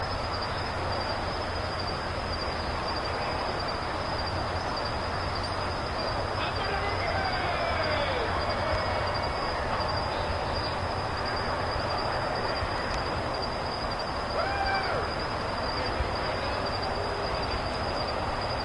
Sounds like someone talking through a PA at a country music concert or something. I walk towards the hooting and hollering and it gets quieter the closer that I get to what I perceive as the source. It must have been bouncing off something off angle from me?
party, pa, field-recording, event